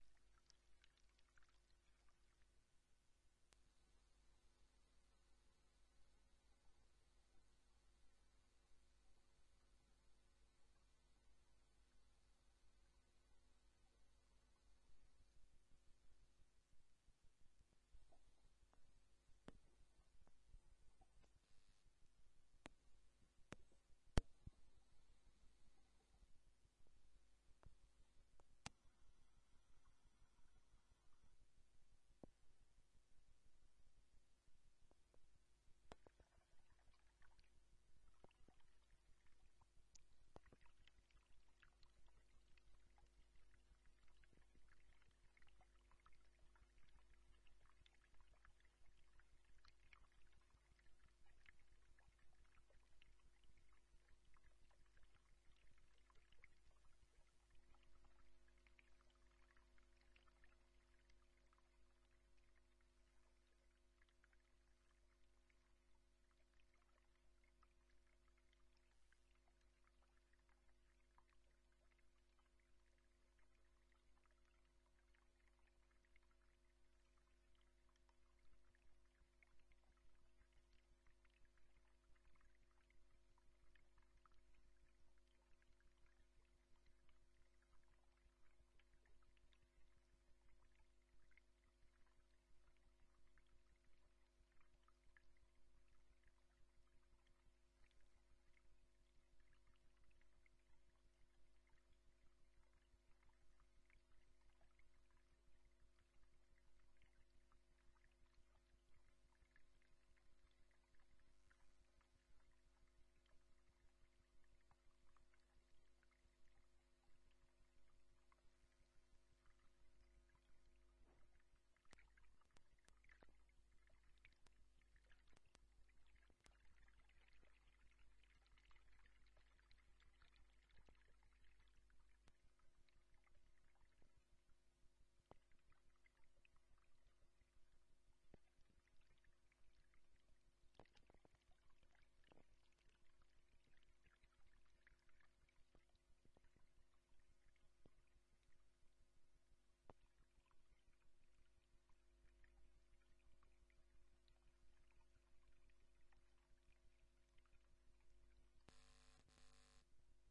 A relaxing recording of water recording using a DIY piezo transducer Mic. Samples were recorded by plugging the Hydrophone into a Zoom H1.
underwater, canal, stream, liquid, river, water, drops, foley